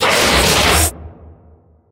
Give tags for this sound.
bash; bat; break; building; car; collision; crash; crashing; door; echo; gate; hit; house; impact; kick; metal; pinball; punch; reverb; rock; smash; stone; thud; vehicle

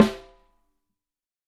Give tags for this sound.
13x3; atm250; audio; drum; fuzzy; multi; sample; snare; tama; technica; velocity

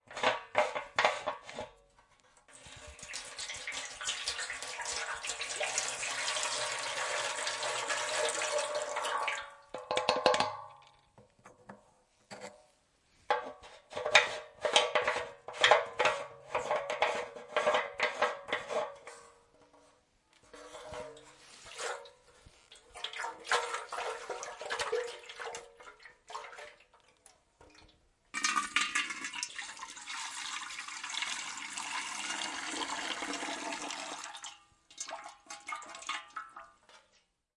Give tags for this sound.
lid; Pouring; Metal; Liquid; Bucket; Canister; Water; opening; Bottle

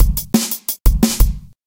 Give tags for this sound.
loop; snare; dnb; breakbeat; rhythm; groovy; drum; drumnbass; drumandbass; percussion; drum-loop; beat; drums; bass; percussive; percussion-loop; drumstep; percs; funky; kick

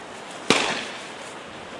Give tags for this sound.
field-recording; hunting; shotgun

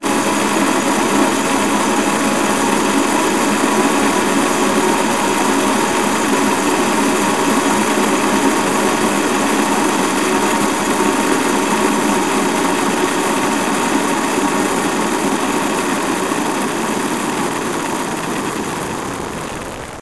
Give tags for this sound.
background; choir; granular; pad; processed

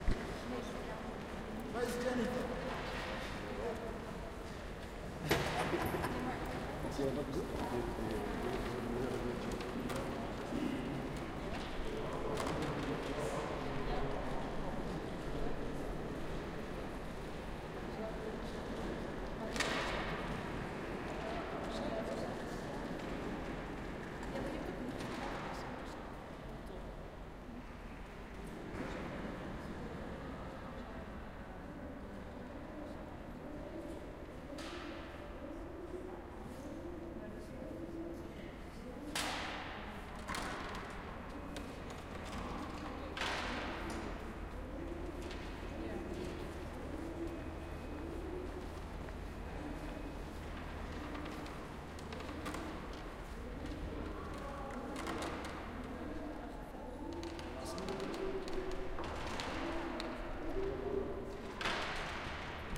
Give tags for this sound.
ambiance; ambience; ambient; atmosphere; background; background-sound; cathedral; creepy; door; doors; echo; field-recording; Fieldrecording; general-noise; hall; laughing; people; pigeon; soundscape; squeek; squeeking; talking

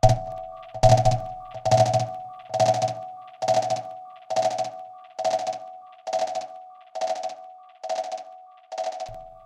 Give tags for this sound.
bell; reaktor; vibe; dub; sounddesign; experimental

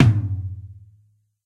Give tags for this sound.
acoustic; rick; drum; stereo

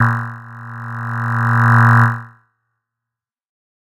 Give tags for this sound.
tech; pad

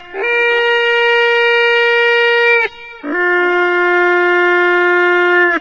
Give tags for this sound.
finnish; parp; birch-bark-horn; ring-tone

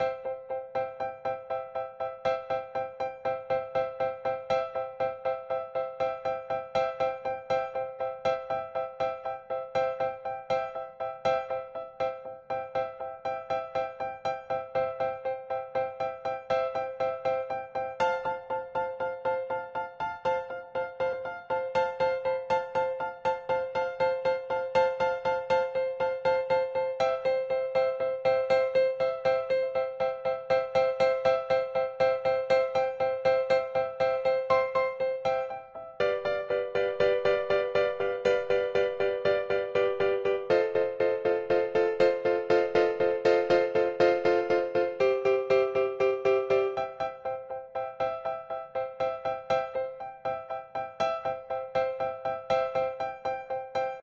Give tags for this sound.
80; beat; blues; bpm; Chord; Do; HearHear; loop; Piano; rythm

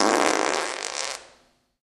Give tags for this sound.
fart; flatulence

